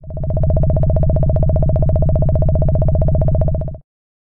Woodpecker Pecking
A sound effect rather like the pecking of a woodpecker. (Created with AudioSauna.)